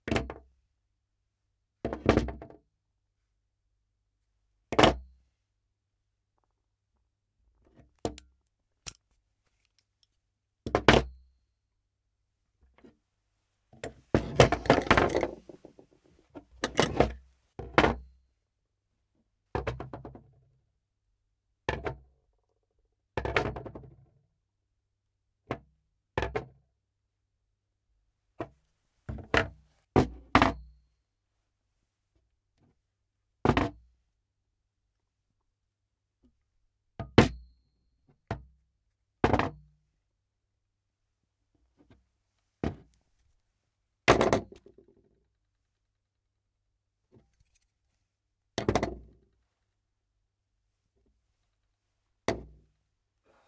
TOOLS PLACED ON WOODEN SURFACE
Recorded for an animation foley session using a Neumann TLM103 and a variety of metallic tools on a wooden table.
DROP, FOLEY, HIT, METAL, MOVES, NEUMANN, PICKUP, PLACED, PUT-DOWN, SFX, SPOTFX, SURFACE, TABLE, TLM103, TOOLS, WOOD, WOODEN